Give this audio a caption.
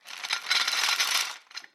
Chain pulled from a chain pulley.